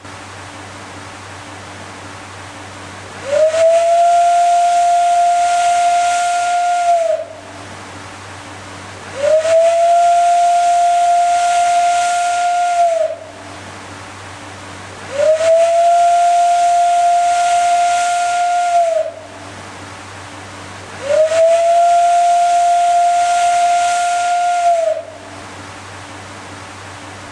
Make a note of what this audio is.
Steam Whistle at the Barncroft Mill Engine in Lancashire. Ambient escaping steam sound from a vent between blasts of whistle. Whistle was sounded once. Edited to give four blasts. Audio taken from a video camera recording
Barncroft-mill-engine-steam-whistle, field-recording, Live-steam